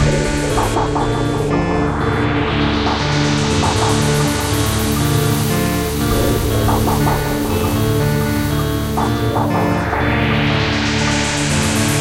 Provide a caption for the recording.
This loop has been created using the program Live Ableton 5 and someof the instruments used for the realization Usb Sonic Boom Box severalsyntesizer several and drums Vapor syntesizer Octopus Synthesizer WiredSampler Krypt electronic drum sequencer reaktor xt2 Several Synt diGarageband 3